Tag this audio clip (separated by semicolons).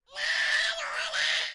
panska; yelling; czech